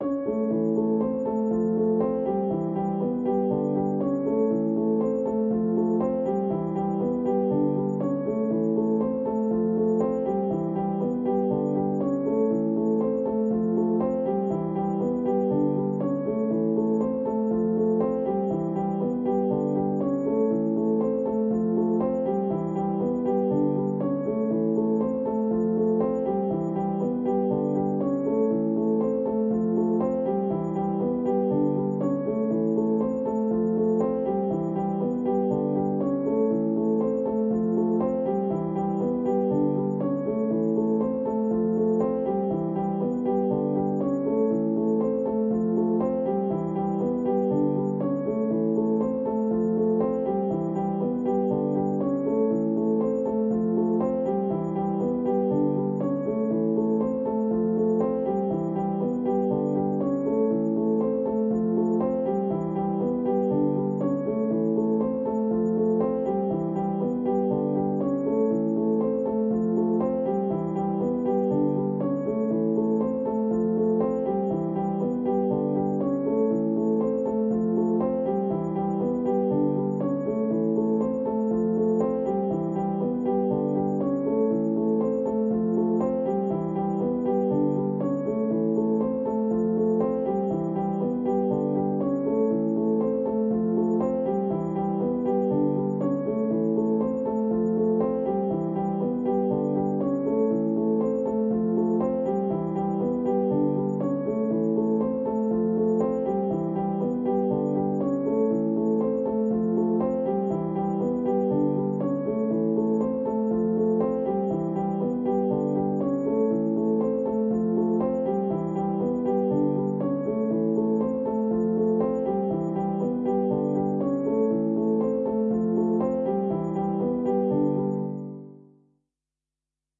Piano loops 068 octave long loop 120 bpm
120, 120bpm, bpm, free, loop, music, Piano, pianomusic, reverb, samples, simple, simplesamples